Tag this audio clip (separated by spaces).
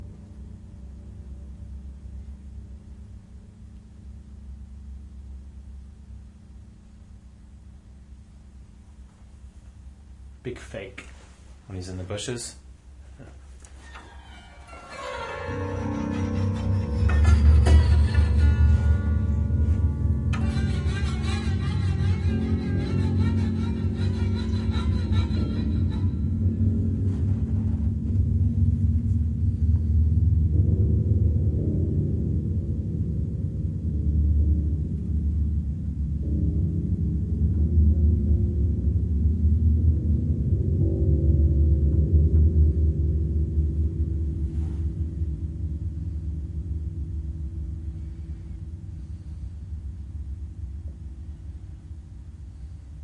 effect fx horror industrial piano sound soundboard